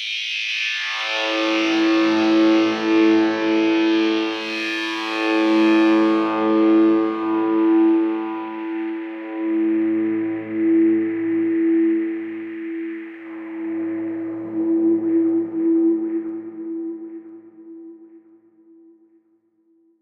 feedback ef 16
A sample I made using my Fender Strat heavily processed using reverb, phase, and filter. A nice sound I think - could have lots of uses, perhaps as an intro or a fill.
electro, feedback, guitar, music, noise, processed, rock